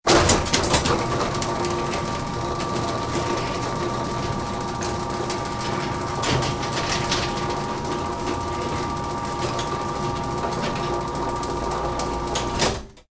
Garagedeur-Sluiten1
Sluiten van een Garagedeur. Closing a garage door